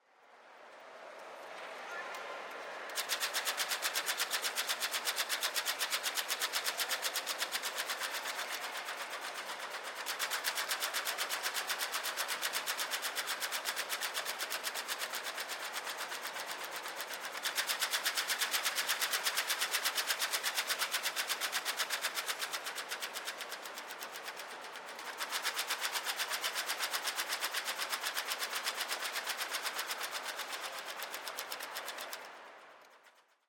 Mechanical alphanumeric flapboard signs have long been a standard fixture of airports and train station waiting rooms, but the technology is rapidly disappearing in favor of more moder (if also more soul-less) electronic displays. Also known as "Solari Boards" (most were made in Italy by Solari di Udine), the signs' familiar "clack clack clack" rhythm is sufficient to trigger an almost Pavlovian reflex in seasoned travelers the world over. This 34 second sample was captured on September 20, 2006 in the main waiting room of Amtrak's Philadelphia, PA (USA) 30th Street rail station as the train status board was being updated. Equipment used was a pair of MKH-800 microphones in a mid-side arrangement (hyper-cardioid and figure-8) and a Sound Devices 744T digital recorder. This recording has been mixed down to monaural and heavily low-cut processed to reduce ambient noise, and can be mixed as a sound effect over an existing dialog or ambient track.
mono, dial, airport, train-station, numbers, solari, low-cut, flap, board, flapboard, field-recording, waiting-room, processed, sign